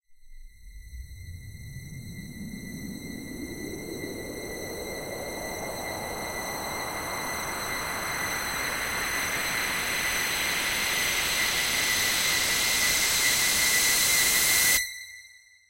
A sound effect that could be used for making a suspenseful movie or video or for a suspenseful scene in a movie or video.